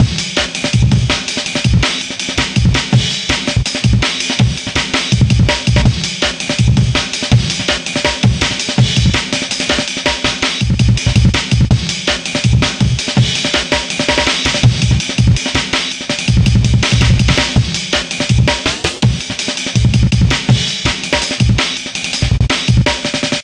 amen break mashed 164bpm
4 bars of sliced and then altered amen break from my unreleased tune.
break, amen